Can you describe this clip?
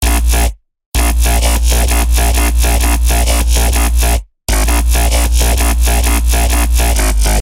becop bass 8
Part of my becope track, small parts, unused parts, edited and unedited parts.
A bassline made in fl studio and serum.
a talking and grindy reversed bassline at 1/6 over 1/4
bass, Djzin, dubstep, electro, electronic, fl-Studio, grind, loop, loops, low, sub, synth, techno, wobble, Xin